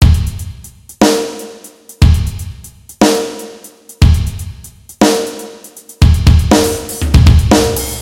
Slow Drum Loop Transition
A slow drum loop ending with a transition to the next measure. Created with Guitar Pro 6 Trail Version.
Slow, snare, drumkit, loop, beat, music, kit, drum, instrument, transition